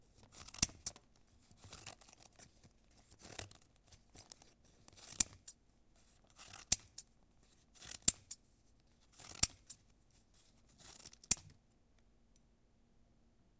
Scissors Cut
Cut cut. Recorded with a Zoom H2.